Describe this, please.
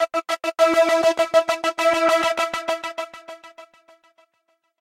THE REAL VIRUS 02 - SINETOPIA LFODELAYS 100 BPM - E5
This is what happens if you put two sine waves through some severe filtering with some overdrive and several synchronized LFO's at 100 BPM for 1 measure plus a second measure to allow the delays to fade away. All done on my Virus TI. Sequencing done within Cubase 5, audio editing within Wavelab 6.